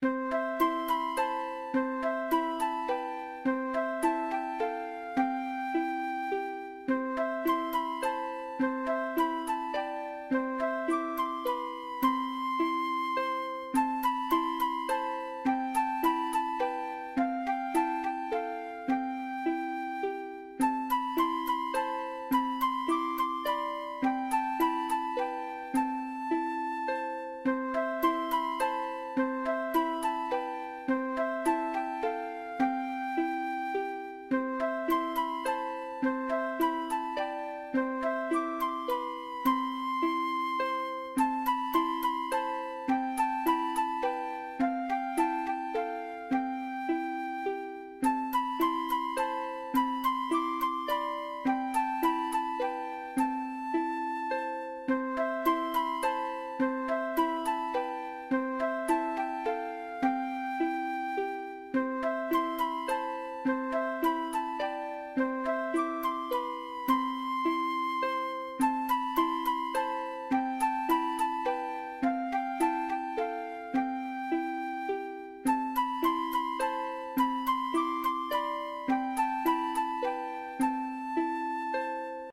calm happy rpgTownBackground
Calm rpg town background music for a video game. 30 second theme that's looped three times.
background-music, calm, game, happy, loopable, music, rpg, town, video-game